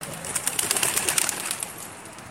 Birds taking off fly
Birds start to fly take off to fly then landing.
Birds, take-off, fly, dove, bird, doves, flying, landing